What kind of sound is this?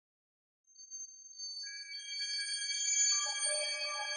FlutesoftheNetherland 001 hearted
ominous, scary, serious, creepy, unsettling, syth, hollow, sythetic, reverb